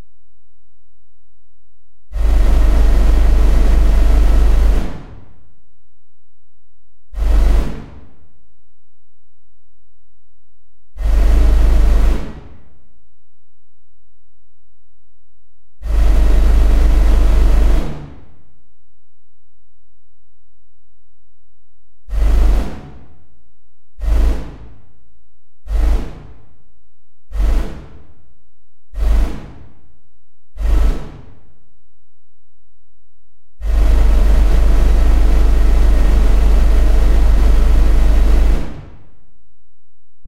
SYnth NoisesAK
Even MORE SYnthetic sounds! Totally FREE!
amSynth, Sine generator and several Ladspa, LV2 filters used.
Hope you enjoy the audio clips.
Thanks
Factory, Machine, Machinery, Sci-Fi, Strange, Synthetic, Weird